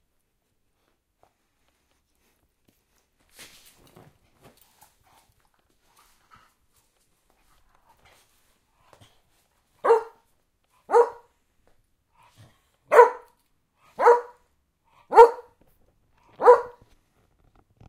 My dog barking
bark, dog, woof